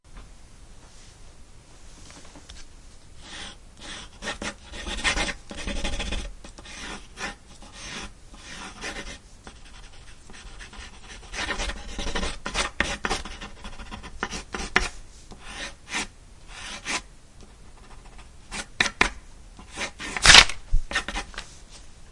Recording of a fast sketch done with hard (2H) pencil on rough paper. The paper is attached to a wooden board. Equipment: cheap "Yoga EM" microphone to minidisc, unedited.
rough-paper, sketching, paper, pencil, field-recording, wood, drawing